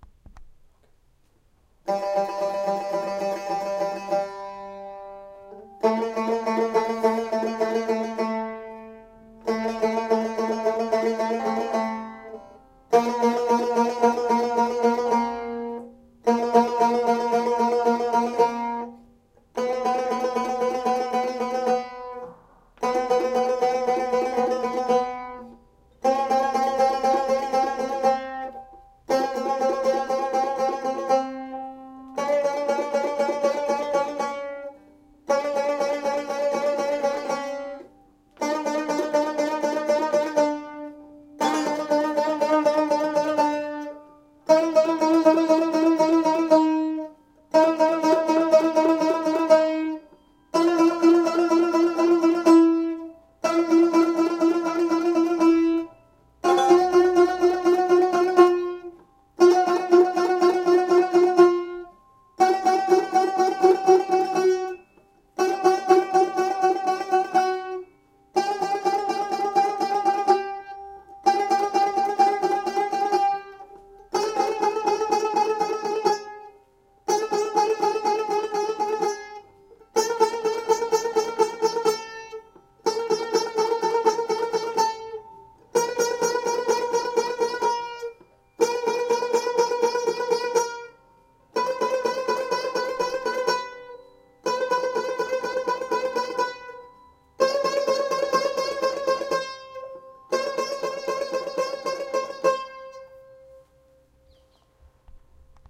Embellishments on Tar - Middle string pair
Tar is a long-necked, waisted string instrument, important to music traditions across several countries like Iran, Afghanistan, Armenia, Georgia, Republic of Azerbaijan, Turkey and other areas near the Caucasus region. This recording features tar played by Turkish musician Emre Eryılmaz.
He shows a common embellishment played in tar. With each stroke he bends/unbends the string such that the two consecutive pitches are a semitone distant. The stroke and the bending/unbending occurs simultaneously hence the pitch changes gradually rather than discretely. In this recording, Emre plays the middle string pair.
compmusic, embellishments, makam, music, tar, turkey